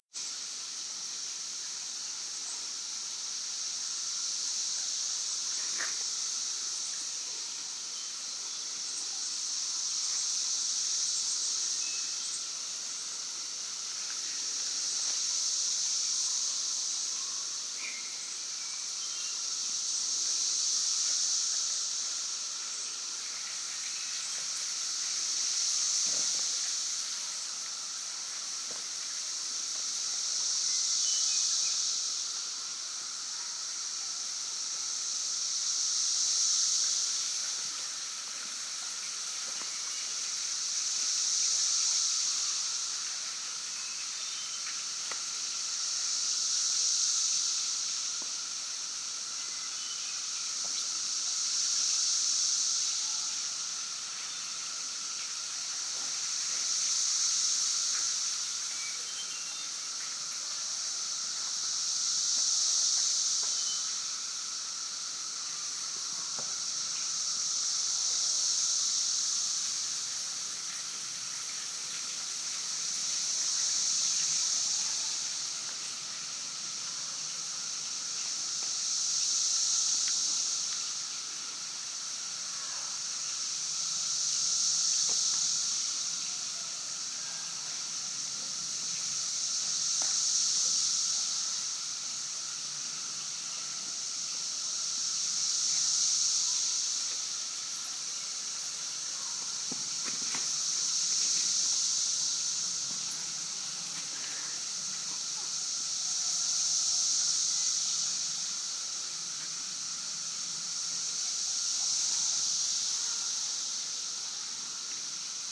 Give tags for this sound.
17-year; Brood-V; Hocking-County; Hocking-Hills; Hocking-Hills-State-Park; Logan-Ohio; Ohio; cicada; cicadas; magicicada; song

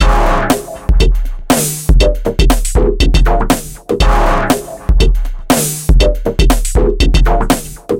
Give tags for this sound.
minimal; experimental; drumloop; 120bpm; loop

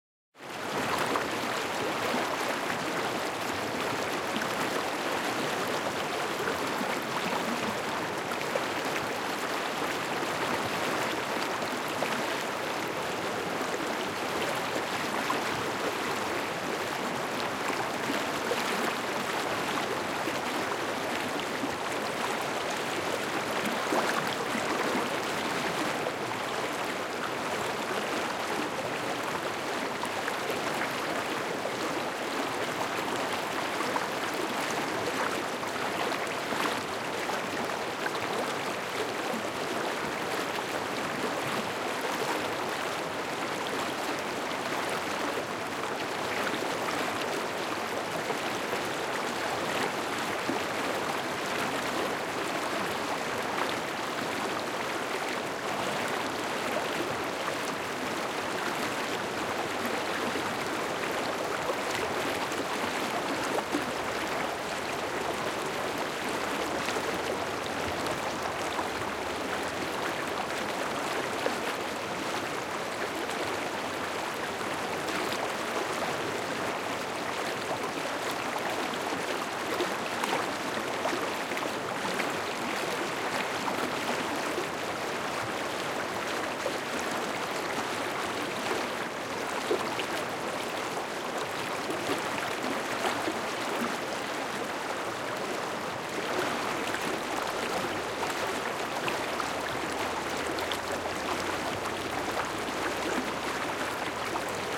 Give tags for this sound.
close; rapids; river; rushing; smooth

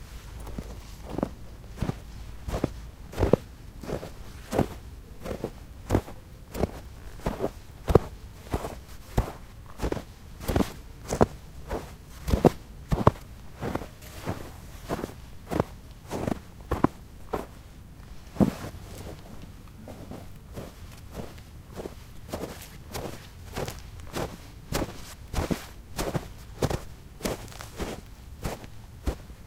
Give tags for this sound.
boot; walking; footsteps; feet; winter; steps; footstep; snow; step; cold; walk; boots; shoe; foot; shoes

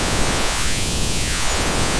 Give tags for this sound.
stereo; audio-paint; wow; dare-26; zing; synth; image-to-sound; sci-fi

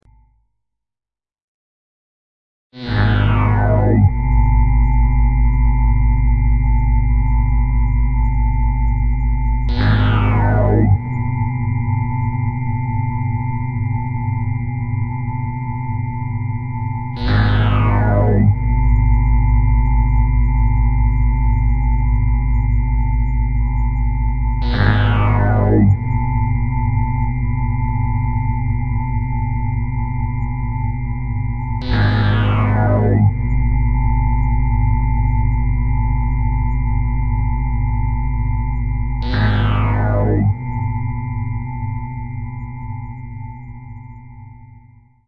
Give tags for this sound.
machines; Noise; Rhythmic; Synthetic; weird

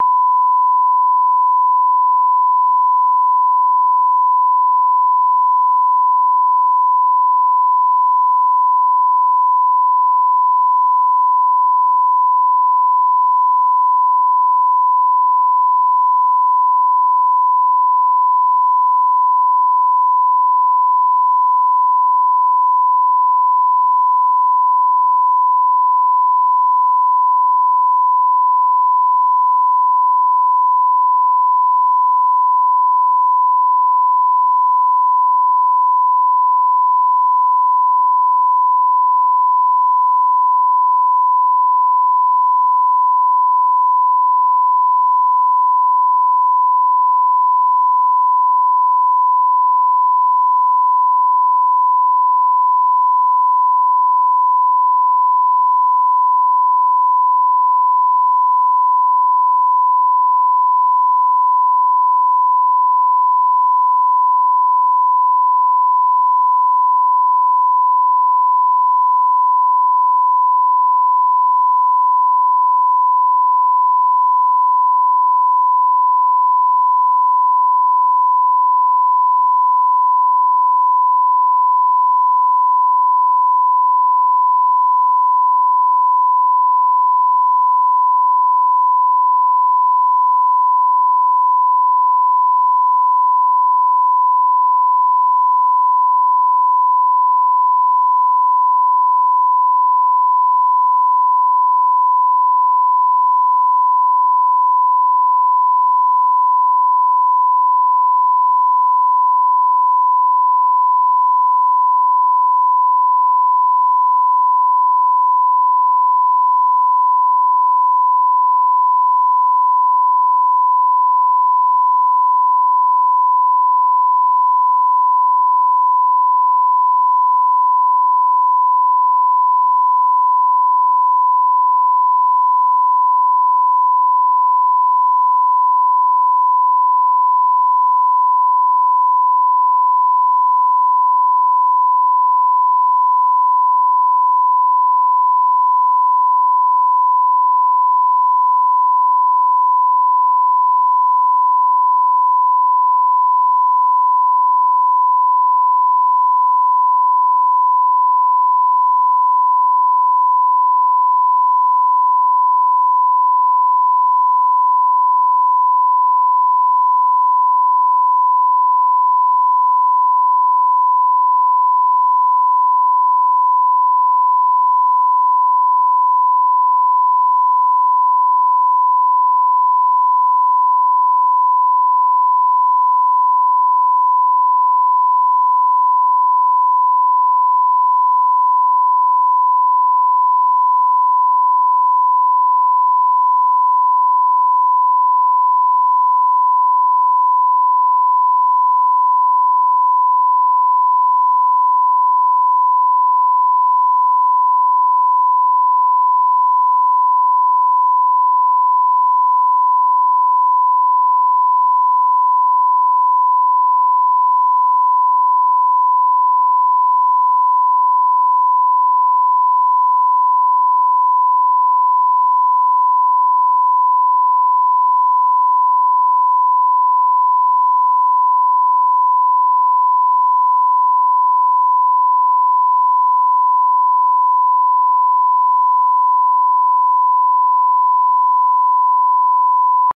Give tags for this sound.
electric; sound; synthetic

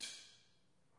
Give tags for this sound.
clap; echo; hit; reverb; snap

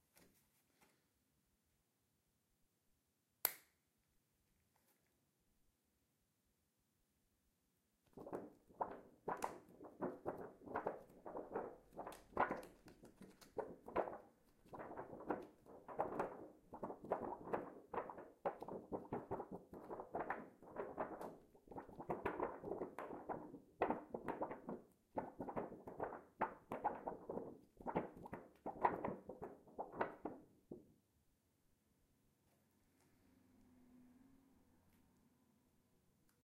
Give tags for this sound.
floppy; plastic; raw

